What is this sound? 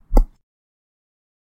stone footstep 4
Footsteps on stone recorded with a Zoom Recorder